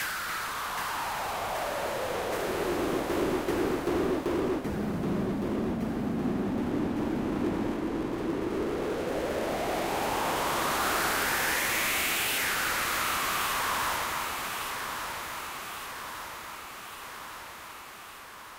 noise sweep
a simple filter sweep I created using white noise wave and a filter to create the sweep
delay, filter, fx, noise, processed, sweep